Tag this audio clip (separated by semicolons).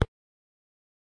Click; Menu